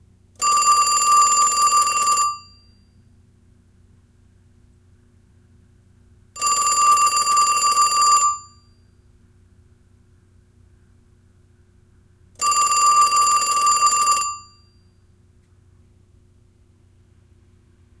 Coquette French Style Phone
Three Rings. The "Coquette" French Style Telephone. Made in Japan. Sold through the Bell System in the 70s and 80s as part of the "Design Line" collection. "French styling at an affordable price." White with gold trim - rotary. Recorded with Sony ECM-99 Stereo Microphone to SonyMD.